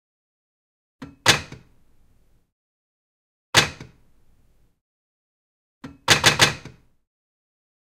Mechanism Stuck
A mechanism is stuck in a film or game. You just have to crank it harder!
Recorded with Zoom H2. Edited with Audacity.